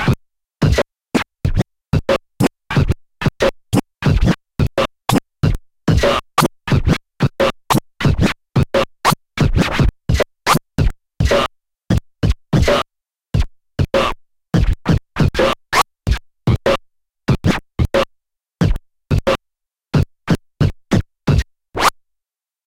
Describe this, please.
Scratched Beats 005
Scratching Kick n Snare @ 98BPM